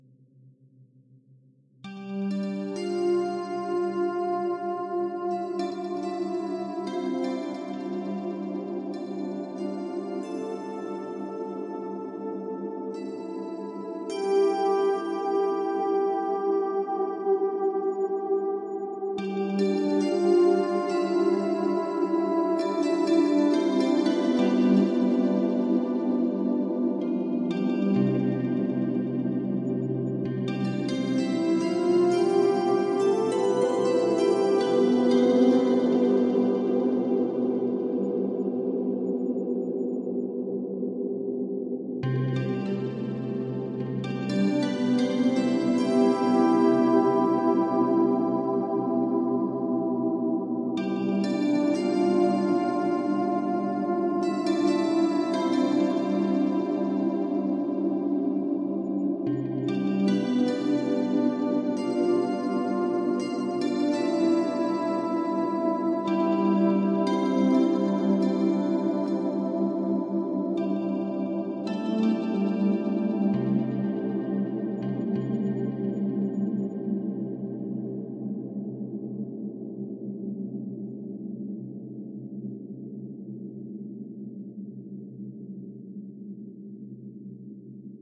AudioKit D1 Space Is Outside
Playing with the Audiokit D1 synth for iPad using the “Space Is Outside” patch (in the “Epic/Pad” category).